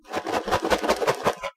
shake popcorn bag2
shaking a bag of popped popcorn
bag, popcorn, shake